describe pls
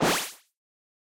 Bouncing Power Up 1 3
In-game power-up type sound made using a vintage Yamaha PSR-36 synthetizer. Processed in DAW with various effects and sound design techniques.
Sound, Video, Up, Game